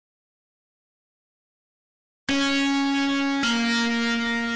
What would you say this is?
105 Undergrit Organ Layer 04
slighty gritty organ layer
cake, free, layer, organ, slightlygrity, sound